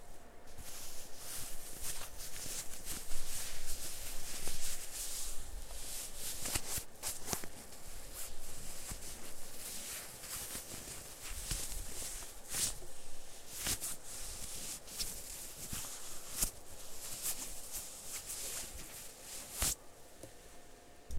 PVC stroking
Hands stroking a canvas/PVC coat.
Stroking, original, Canvas, Synthetic, unusual, Strange, weird, PVC, Material